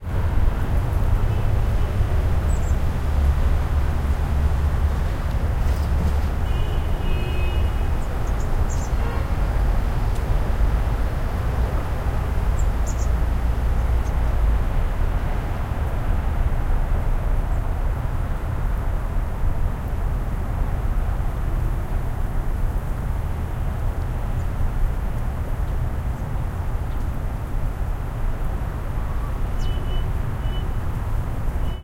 0103 Traffic background and birds
Traffic in the background and horns. Some birds
20120118
birds, korea, field-recording, traffic, seoul, horn